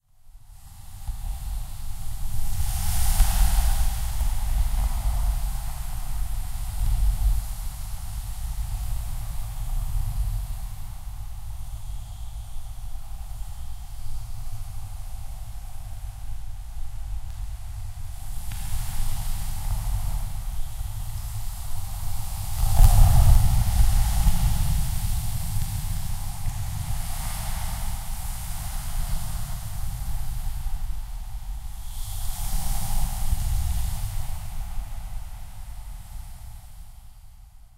Calm Ocean Breeze Simulation, created using the Zoom H1. Sound was created using water faucet, and carpet to catch ambient close sounds to create this effect. Added Pop Compression Filter and Verb. A little credit wouldn't hurt. Thank you and stay tuned for more improved sound effects.
beach, Highway, Malibu, Monica, ocean, Pacific, PCH, Rocks, Santa, seaside, shore, Splash, Wake, water, wave, waves